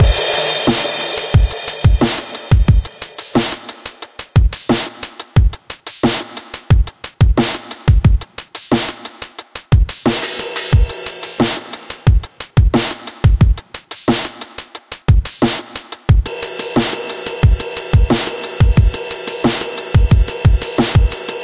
CrashBreak2Rideout
Lead, dvizion, Drums, Dream, Heavy, Vocals, 179BPM, DrumAndBass, DrumNBass, Rythem, Fast, DnB, Melodic, Pad, Vocal, Drum, Loop, Bass, Synth, Beat